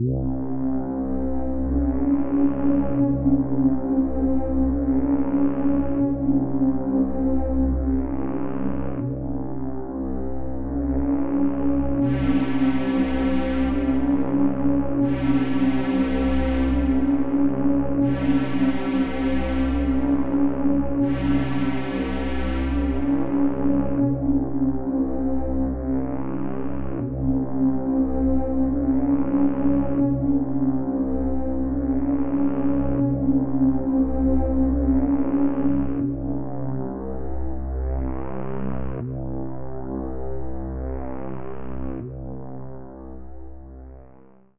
A dark ghostly sound.Made with Ableton.
ambient, dark